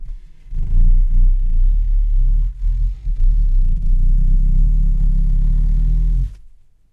recordings of various rustling sounds with a stereo Audio Technica 853A